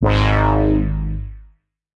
Moog G# Thin
A G# key being played from a VST Moog.
Analog, Electronic